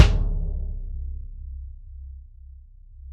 BD22x16-LP-O~v05
A 1-shot sample taken of an unmuffled 22-inch diameter, 16-inch deep Remo Mastertouch bass drum, recorded with an internally mounted Equitek E100 close-mic and two Peavey electret condenser microphones in an XY pair. The drum was fitted with a Remo suede ambassador batter head and a Remo black logo front head with a 6-inch port. The instrument was played with a foot pedal-mounted nylon beater. The files are all 150,000 samples in length, and crossfade-looped with the loop range [100,000...149,999]. Just enable looping, set the sample player's sustain parameter to 0% and use the decay and/or release parameter to fade the cymbal out to taste.
Notes for samples in this pack:
Tuning:
LP = Low Pitch
MLP = Medium-Low Pitch
MP = Medium Pitch
MHP = Medium-High Pitch
HP = High Pitch
VHP = Very High Pitch